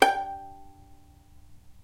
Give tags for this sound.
violin non-vibrato pizzicato

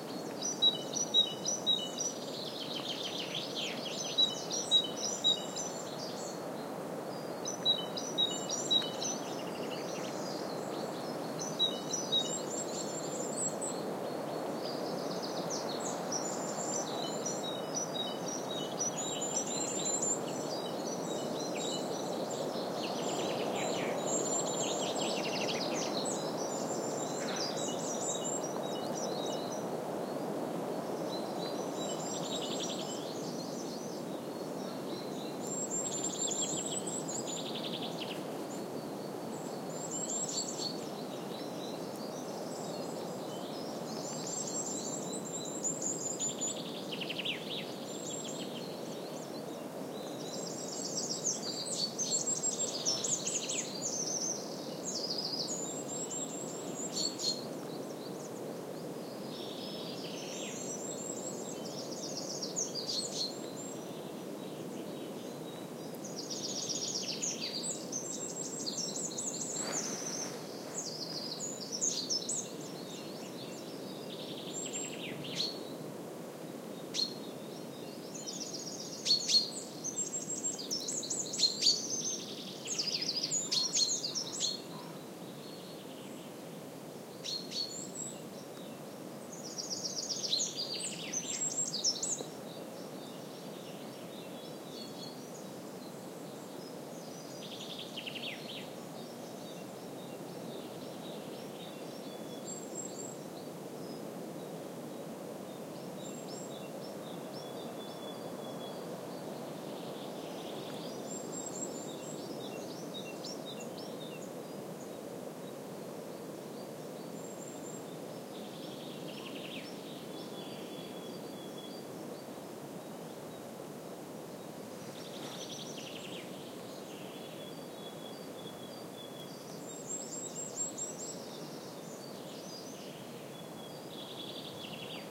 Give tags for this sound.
cazorla nature ambiance mountain spring field-recording birds south-spain

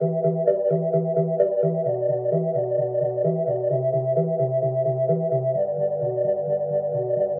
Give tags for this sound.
trance bpm hard 95 house techno

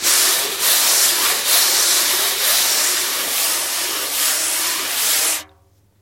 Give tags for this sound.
brush djembe foundsound jazz percussion shwoosh

